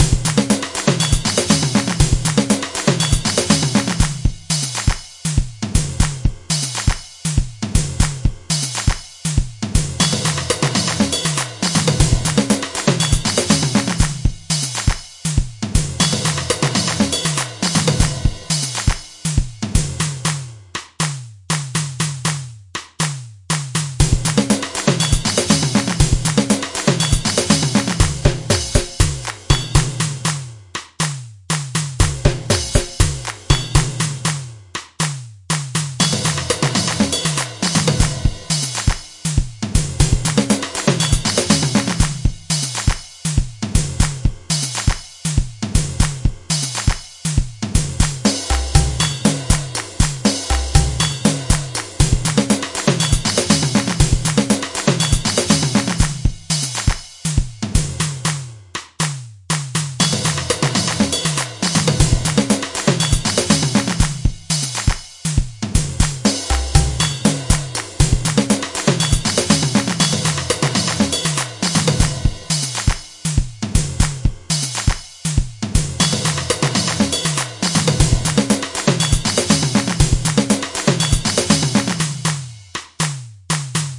Club Music
I used Hydrogen Drum Machine to create the music.
club, dance, electro, house, rave, techno